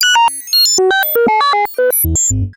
Computer sounds accepting, deleting messages, granting access, denying access, thinking, refusing and more. Named from blip 1 to blip 40.